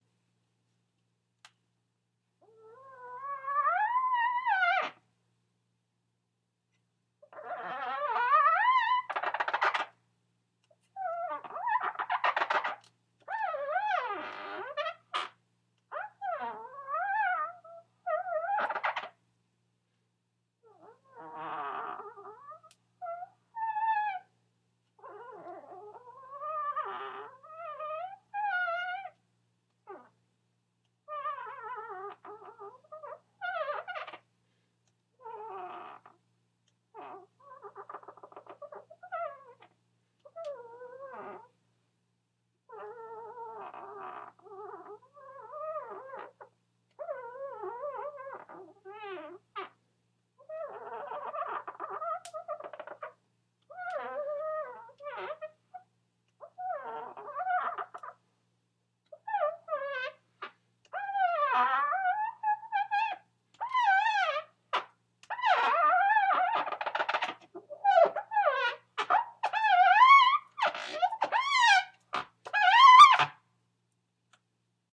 Dolphin Song 1
I accientally discovered this sound when I was erasing a whiteboard with my finger. I immediately hooked up my SM-57 and ran my finger over the whiteboard at various speeds for a couple minutes to get the sound we have here. I didn't add any reverb or other effects to the sound so people could do there own thing with it, so it's a little dry right now. But it'll dress up nicely I think.
ambient, dolphin, environment, r2-d2, r2d2, space, squeak, squeal, synth, texture, whale